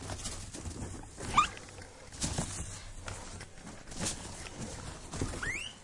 guinea pig
Essen, Germany, January2013, SonicSnaps